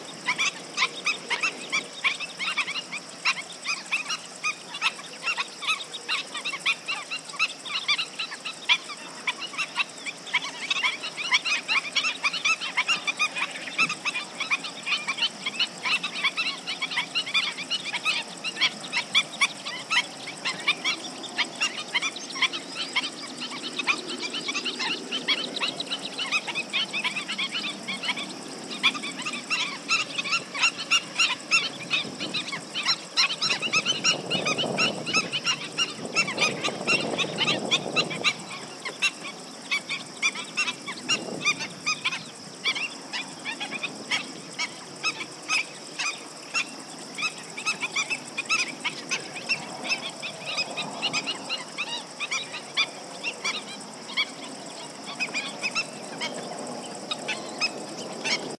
several species of birds wading in a shallow swamp, at Dehesa de Abajo (Puebla del Río, Sevilla), some wind noise. Sennheiser ME 62 > MD
waterfowl, nature, marshes, birds, summer, ambiance, donana